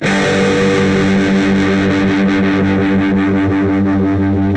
Power chords recorded through zoom processor direct to record producer. Build your own metal song...
chord, power, electric, guitar, multisample